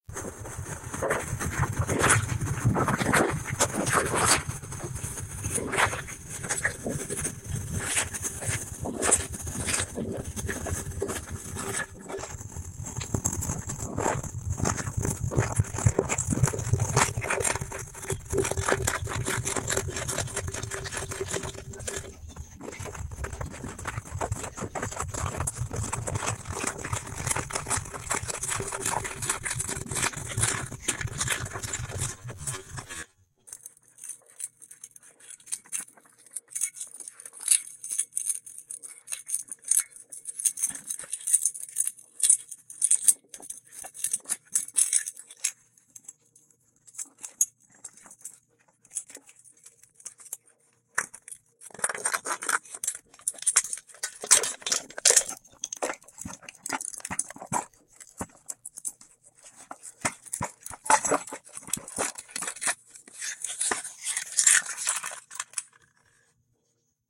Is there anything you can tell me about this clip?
csound - convtest
Testing csound's cross-synthesis opcodes on some field recordings.
ORCH:
kr = 4410
ksmps = 10
nchnls = 2
zakinit 2, 2
instr 1
aconv1 cross2 a1, a3, 4096, 8, 99, 1
aconv2 cross2 a2, a4, 4096, 8, 99, 1
aconv1 diff aconv1
aconv2 diff aconv2
zaw aconv1, 1
zaw aconv2, 2
outs aconv1*(p4), aconv2*(p4)
endin
instr 2
a3 zar 1
a4 zar 2
aconv1 cross2 a1, a3, 4096, 8, 99, 1
aconv2 cross2 a2, a4, 4096, 8, 99, 1
aconv1 diff aconv1
aconv2 diff aconv2
outs aconv1, aconv2
endin
SCORE:
;GAUSSIAN WINDOW
f99 0 4096 20 6 1
i1 0 34 1
i1 34 34 0
i2 34 34
e
concrete, convolution, cross-sythesis, noise, spectral